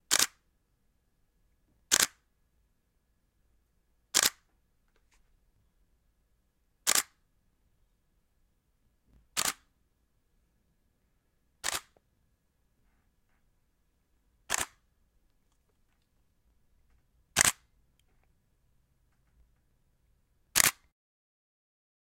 Camera Shutters 1/25th sec (Medium)
The sound of my Nikon D7100's shutter with 70-300mm lens attached. Recorded with the Blue Yeti Pro with the camera various distances from the mic.